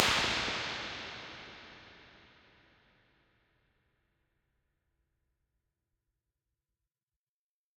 BX Spring 05
Impulse Response of a Swiss made analog spring reverb. There are 5 of these in this pack, with incremental damper settings.